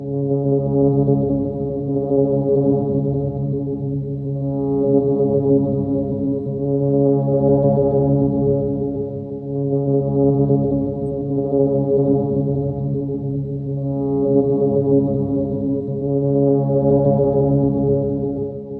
This is the same recording of my Galaxie tailpipe but I've run the audio through a ping pong delay and a reverb - this is the outcome. I used this effect for a hovering vehicle sound in a sci-fi piece that I did.